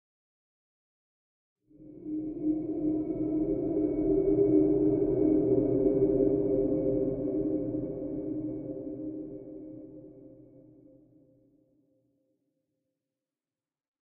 Artillery Drone Cadmium
Second set of 4 drones created by convoluting an artillery gunshot with some weird impulse responses.
Ambient, Soundscape, Drone, Space